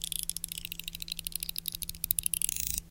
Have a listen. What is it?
utility knife pitch
moving the dial of an utility knife (pitch manipulated)